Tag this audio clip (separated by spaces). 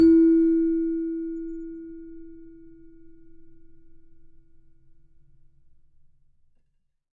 celeste samples